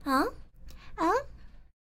Creature voice asking